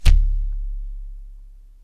Balloon Bass 02
Balloon Bass - Zoom H2